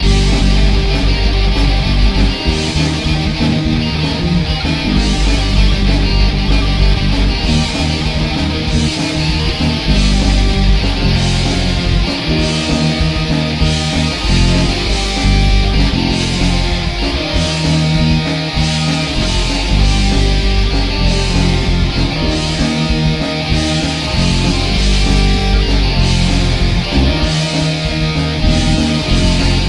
heavy metal loop
soundtrack for a fighting game i'm developing, recorded with Ardour, guitar effects by Rakarack, keyboard ZynAddSubFX, drum machine Hydrogen , drum samples by Matias.Reccius
heavy, metal, soundtrack